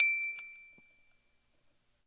MUSIC BOX D 3
15th In chromatic order.
chimes, music-box